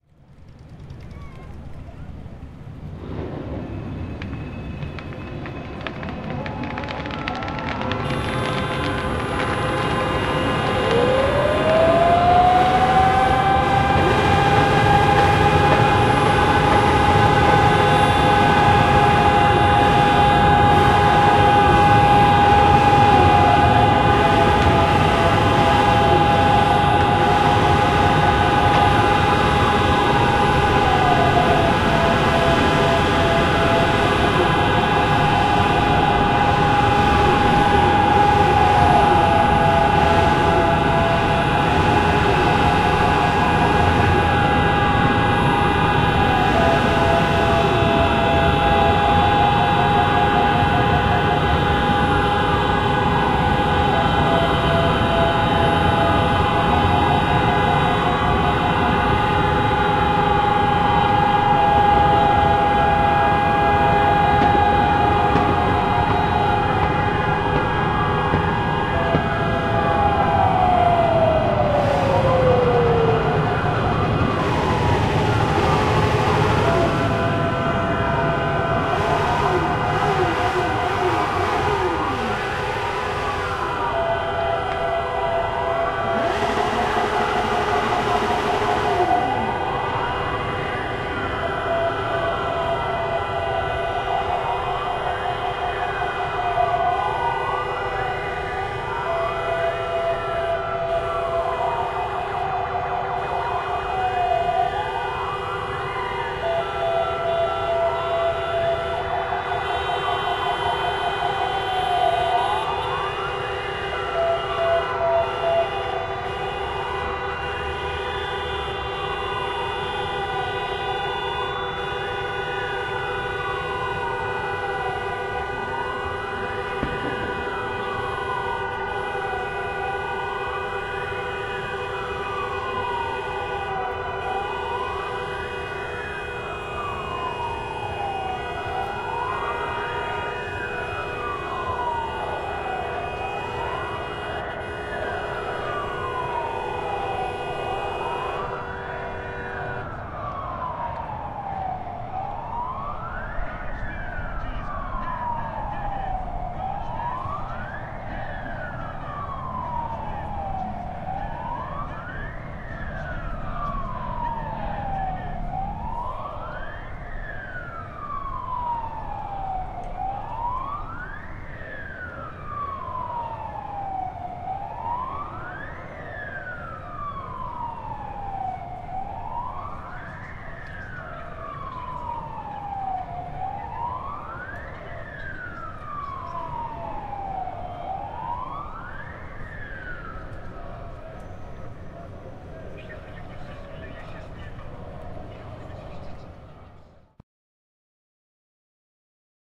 BS Sirens and horns 01.08.2015
Powerful sound of sirens and horns from 71st anniversary of Warsaw Uprising
Recorded with Zoom H4n + Rode mic.
air-raid, alarm, danger, end-of-days, horns, sirens, war, warning, Warsaw-Uprising